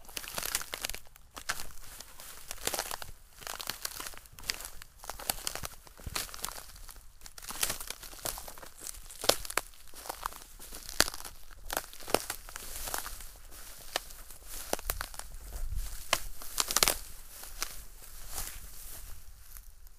breaking branches
h4zoom recoded, stomping on very dry sticks in the wood
ambient, branches, fear